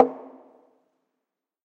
Recordings of different percussive sounds from abandoned small wave power plant. Tascam DR-100.